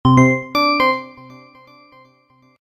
I made these sounds in the freeware midi composing studio nanostudio you should try nanostudio and i used ocenaudio for additional editing also freeware
application, bleep, bootup, clicks, desktop, effect, event, game, intro, intros, sound, startup